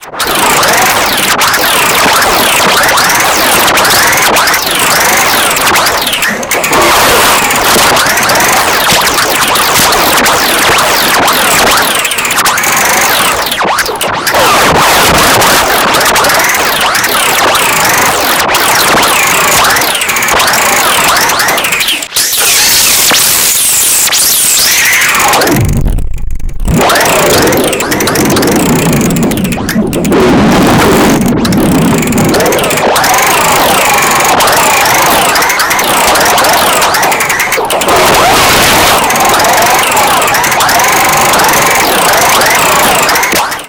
Spoke Spinna 05
Da spoke, da spins, da storted. Field recording of a bike tire spinning, ran through several different custom distortions.
bicycle, click, distorted, noise, spoke, static, weird